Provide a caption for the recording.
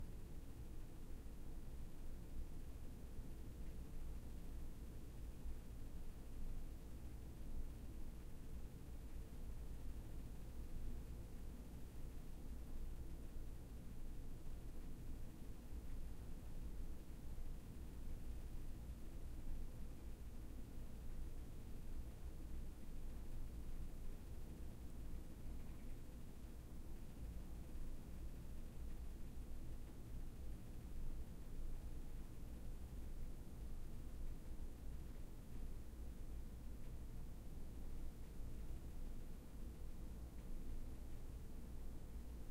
QUIET CLASSROOM ROOMTONE 01
Quiet roomtone of a classroom recorded with a Tascam DR-40
quiet, ambience, roomtone, classroom